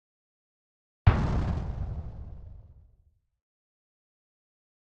Synthesized using a Korg microKorg